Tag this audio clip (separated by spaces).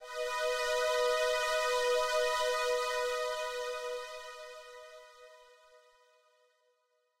synth Music-Based-on-Final-Fantasy Sample